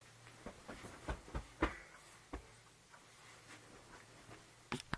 Recorded with a black Sony digital IC voice recorder.